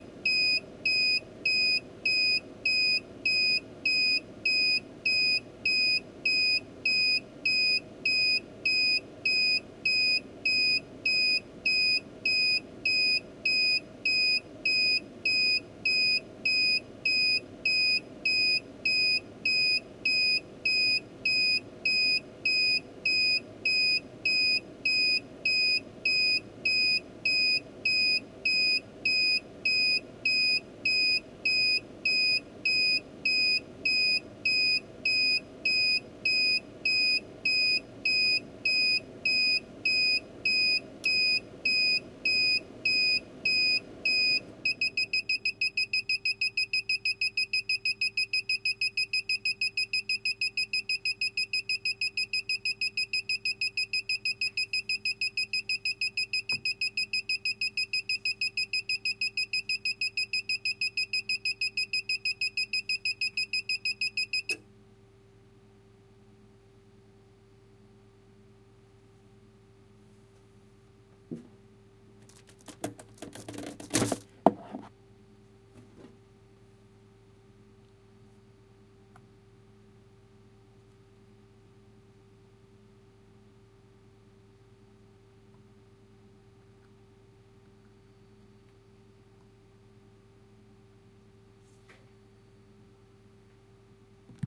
UPS autonomic mode signals
The UPS battery discharge bleeping alarm.
ZOOM H2n recorder
alarm, beep